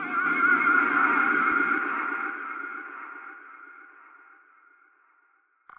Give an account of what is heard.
Filtered Ah
Short filtered vocal by female with some panned delay
lofi, fx, female